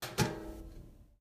Metal Container Shut
a tin metal container closing
container, metal, metallic, shut, tin